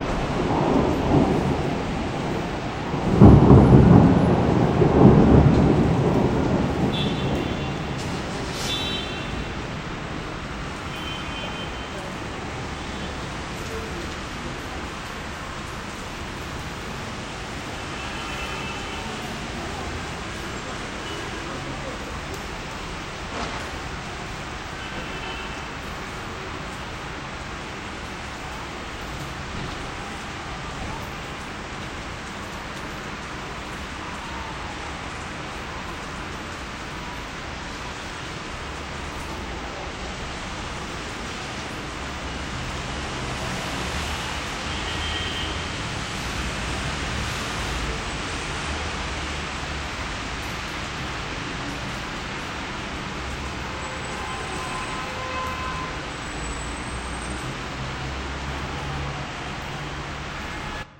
Street horns & rainy day & lightning (reverb+)
ambience, car, city, noise, people, traffic